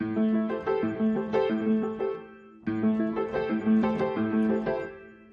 Piano Groove Quartal
Piano Sandoli Brasil recorded whit a pair of Shure SM58 and a Scarlett 2i2. BPM90
quartal, piano, groove, loop, acustic, rhythm